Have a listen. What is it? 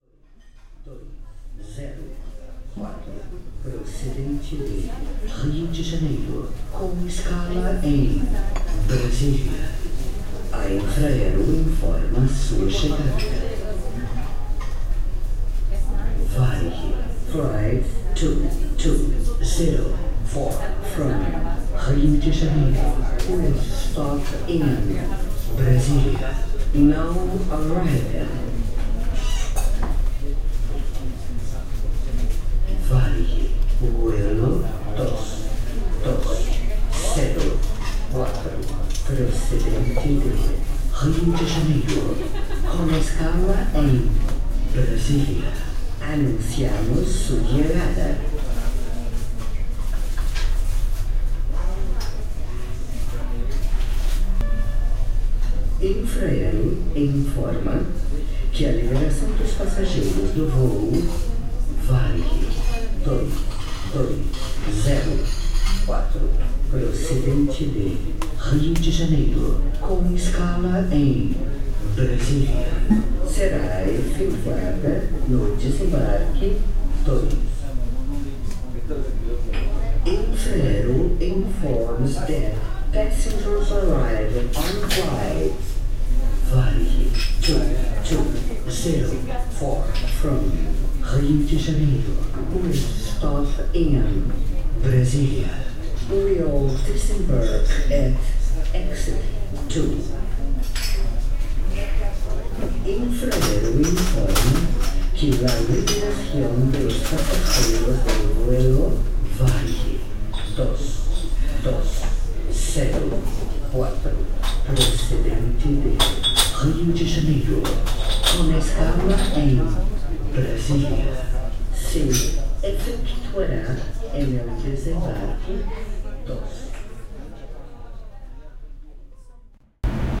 Manaus Airport
Brazil, Manaus
airport august 2002,cafeteria in the waiting lounge,computerized voice
announcing arrivals and departs of flights in Portuguese, Spanish and
English.DAT-recorder,
voice, airport, computer, loudspeaker, holiday, brazil, human